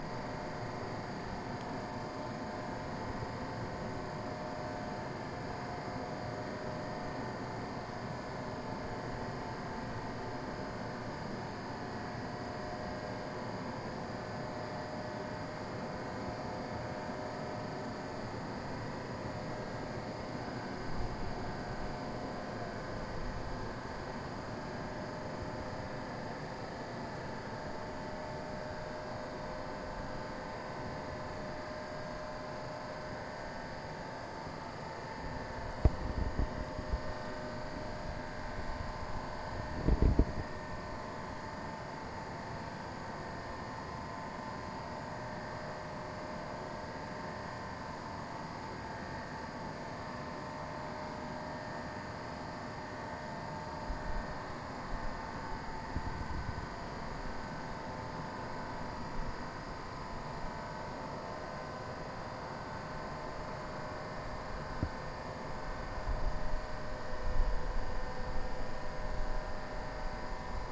Birmingham-erdington-canal-motor-sound-2
Sound of a backing pump or other small electric motor rattling on a canal in Birmingham UK. Direct sound.
Zoom H2 front mic windshield hand held
birmingham, canal, drone, field-recording, hum, motor, pump, uk